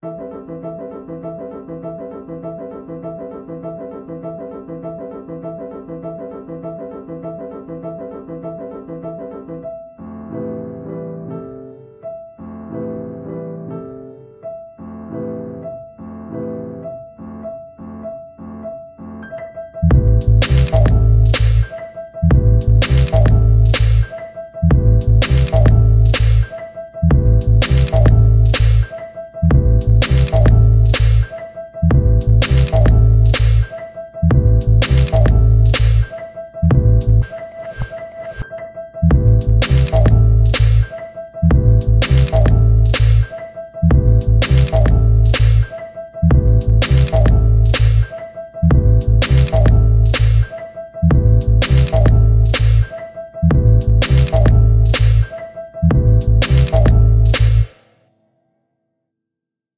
Groovy Hip-Hop / Glitch beat
glitch, hop, beat, hip, loop, video, groovy, piano, 100